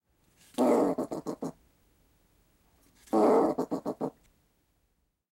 Trichosurus vulpecula Grunts
Brushtail possum (Trichosurus vulpecula) warns off another while it is guarding an apple.